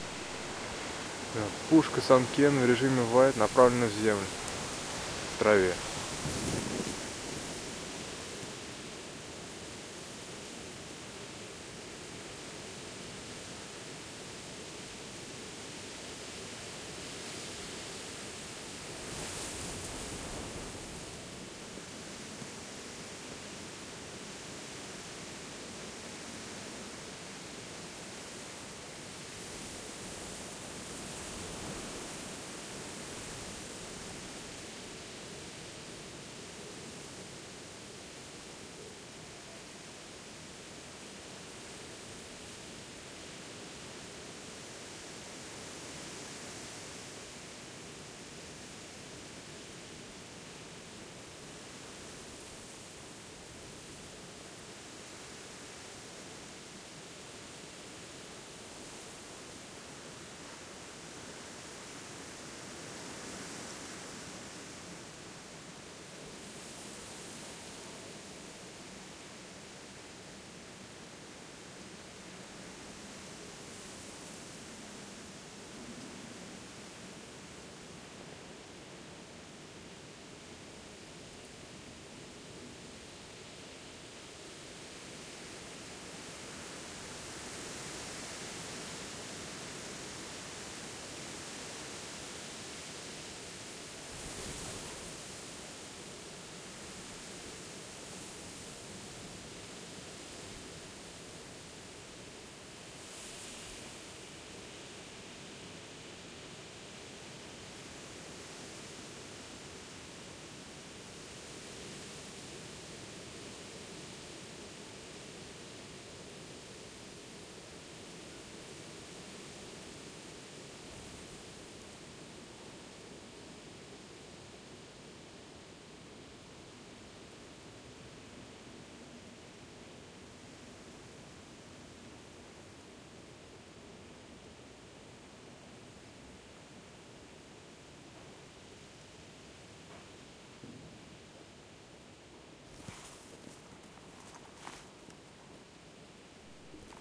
Wind through the grass
Recorded with Sanken CSS-5 microphone and Sound Devices 552 recorder. This is m-s microphone, that have its own decoding matrix with 2 variants - normal and wide. I had choose wide mode and directed the mic in the ground, and i got this interesting effect with accented sides.